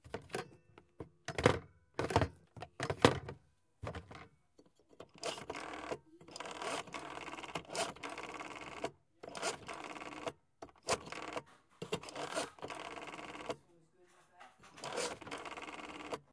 Dialing and rattling the receiver on my grandmother's other phone. Unfortunately could not get a bell sound out of it.
dial, phone, rotary